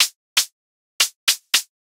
Static Hats
A hi-hat sound I made using Voltage Modular Ignite.
closed, cymbals, drums, hat, hihat, hit, modular, one-shot, one-shotdrums, percussion, sample, synth, tight